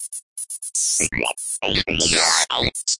sonokids-omni-04
Part of my unfinished pack of sounds for Sonokids, a weird synth sequence of strange sounds.
sequencer, sonokids, digital